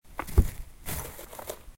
Guinea pig is running again
Guinea pig runs in his cage
pet, panska, czech, guineapig, pets, runs, running, loud